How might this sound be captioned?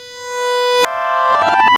Dream exit
sound effect i made from an ipad app
funny, games, sound, sfx, effects